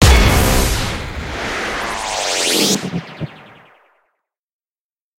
Radio Imaging Element
Sound Design Studio for Animation, GroundBIRD, Sheffield.
bed, bumper, imaging, radio, splitter, sting, wipe